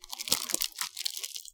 crackling some plastic. there might be some background noise.
crumple, plastic, ruffle